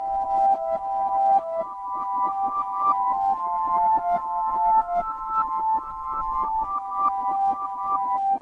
Its a piano melody that I made then flipped backwards in Audacity, has a nice whistling sound. Recorded using auxiliary audio cables connected to keyboard, so it is fairly low quality.
backward, piano, whistle